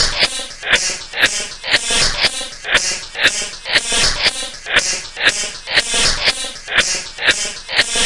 Fx Glitch 6
fx, glitch